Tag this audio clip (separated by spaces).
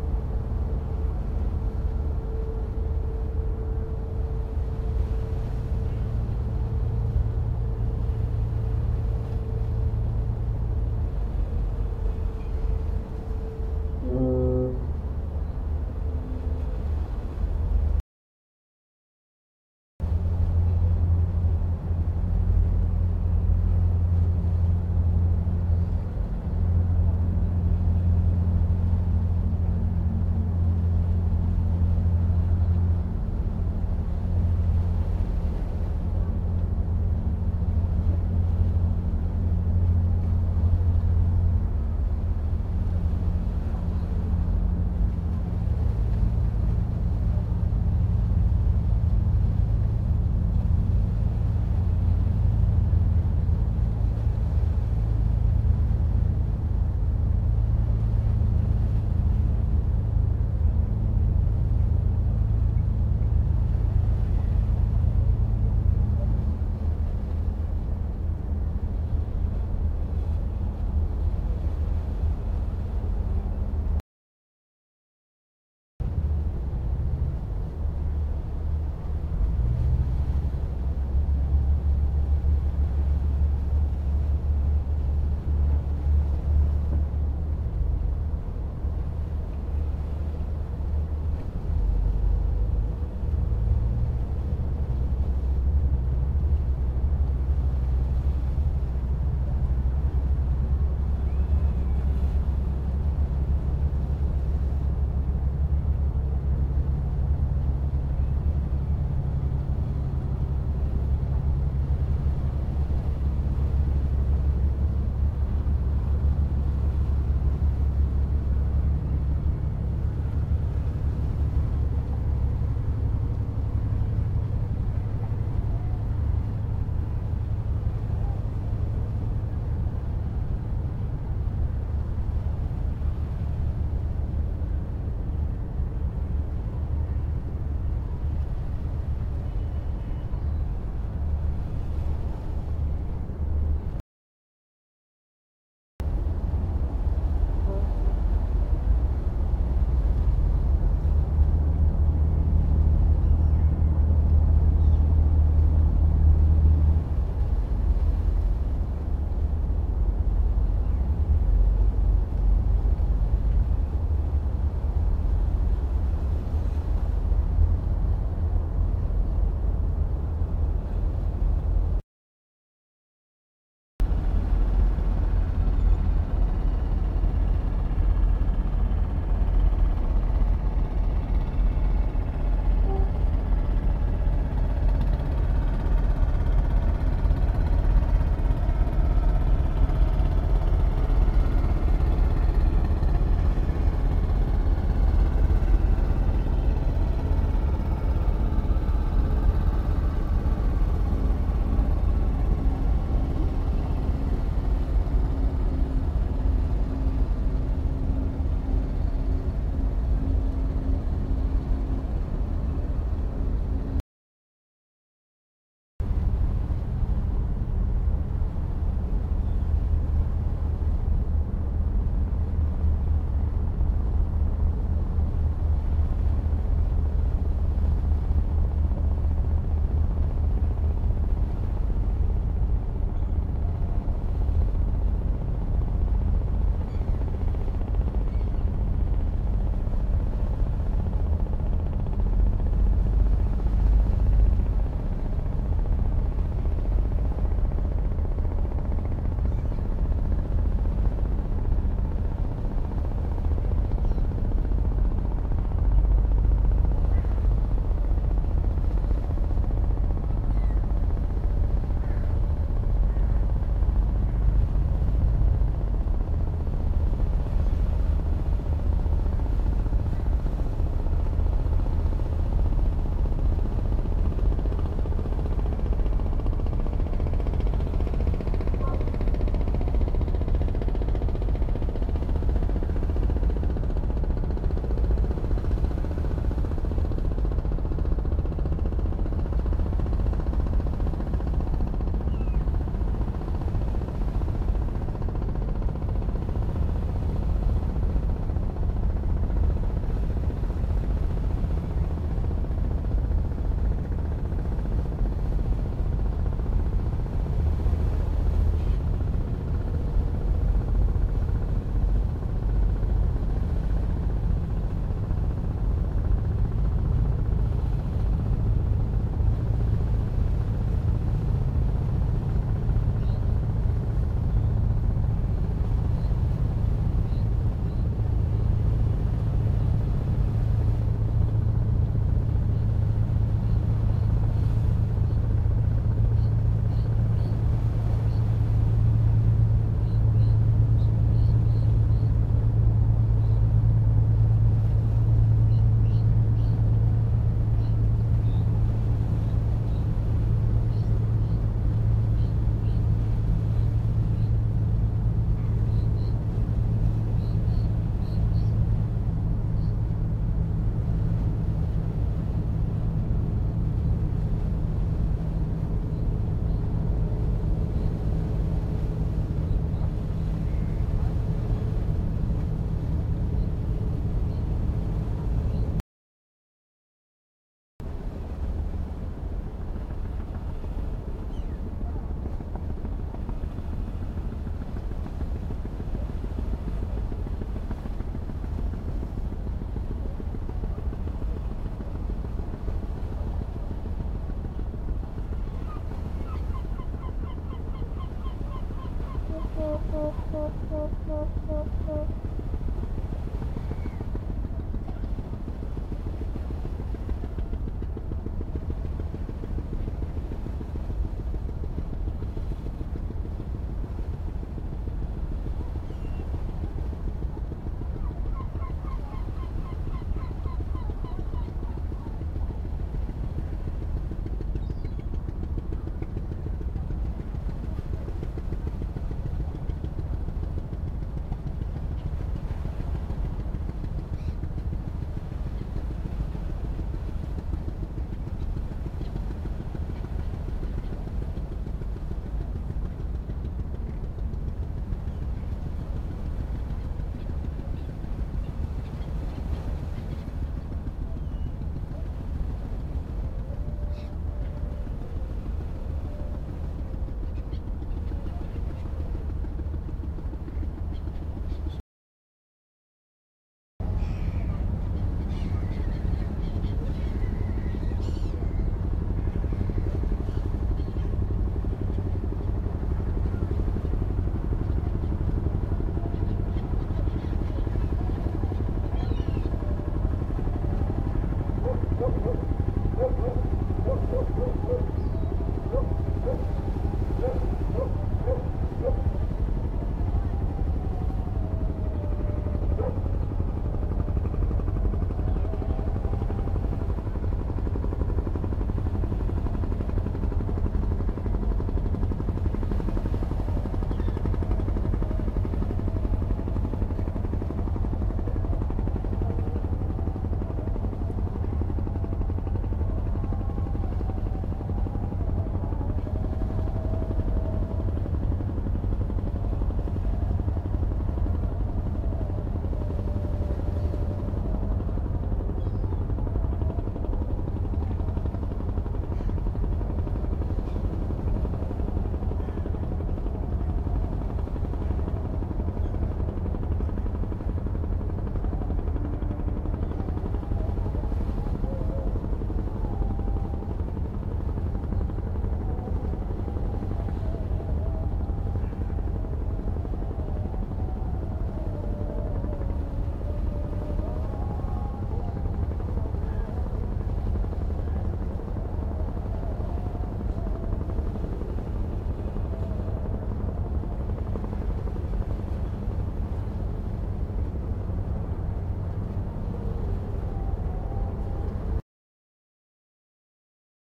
boat bosphorus geo-ip istanbul maidens-tower turkey